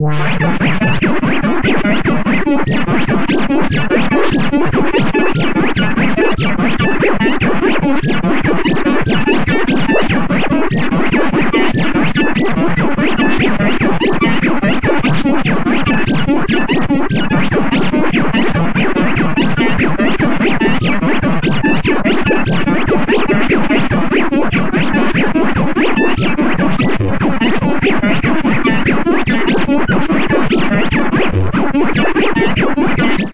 Bleeping 007 (97bpm)
Sounds created by audio-to-midi feedback loops in Ableton Live.
Quasi-musical sequence.
This sound was created at 97bpm (which affected the Arpegiator speed. Arpegiator step most likely set to 1/12). Please note the tempo of the sound itself may not be 97bpm.
For a more detailed description refer to the sample pack description.
Software and plugins used:
Host: Ableton Live
audio-to-midi conversion: GuitarsynSE by Frettedsynth
main synth: Explor3r Extended by TheLowerRhythm (TLR)
Ableton Live built-in midi effects: Pitch, Scale and Arpegiator
If you want to know more why not send me a PM?
Ableton-Live, algorithmic-music, audio-to-MIDI, automated, automaton, chaotic, computer-generated, deterministic, Explor3r-Extended, feedback, feedback-system, Fretted-Synth, glitch, GuitarsynSE, MIDI, synth, The-Lower-Rhythm, TheLowerRhythm, TLR, VST